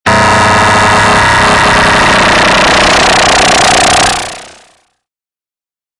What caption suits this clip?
Harsh FM World 6
ΑΤΤΕΝΤΙΟΝ: really harsh noises! Lower your volume!
Harsh, metallic, industrial sample, 2 bars long at 120 bpm with a little release, dry. Created with a Yamaha DX-100
2-bars, industrial